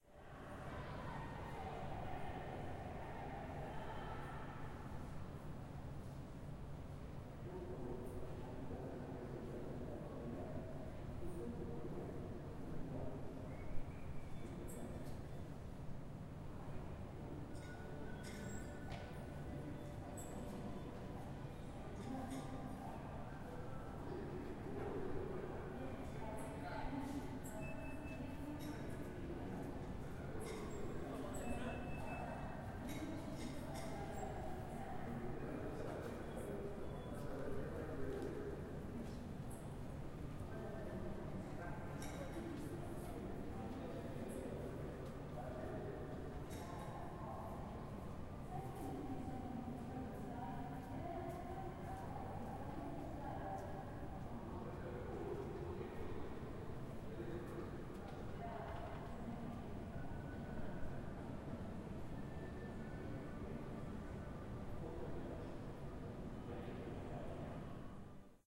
Ambiente - Jose Hernández
A brief stereo recording, with an H4N's stereo mics, of a not so busy subway station in Buenos Aires, Argentina. (Station is "Jose Hernández".)